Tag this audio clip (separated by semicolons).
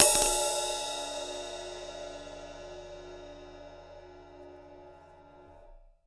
cymbal; perc; percussion; ride